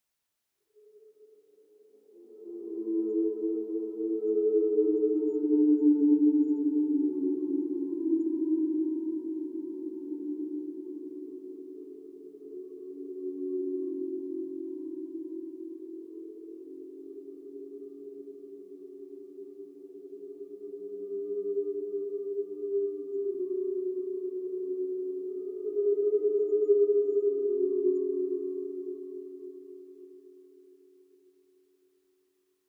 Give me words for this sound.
A ghost for you to use. Believe it or not, I used the sample I put as a remix, from 0:07 to 0:12. I think this is an effective ghost, what about you?
ghost, halloween, haunt, haunted, spooky